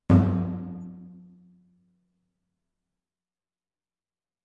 Davul(Greek ethnic instrument) Beat Recorded in Delta Studios. Double Beat.
Effect used: Default Space Designer
Drum, Ethnic-instrument, FX, Greece, iekdelta, Kick, Pontos